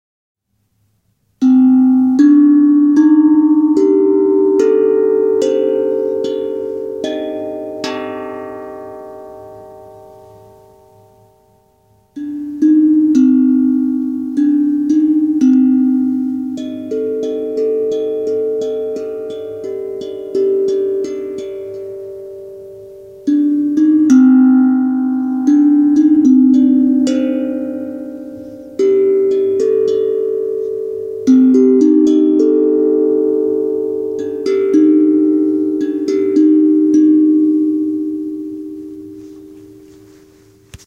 A recording of my Hapi drum